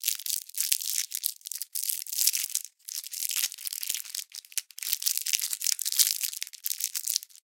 candy wrapper crinkle big D
a lot of crinkling a plastic candy wrapper with fingers.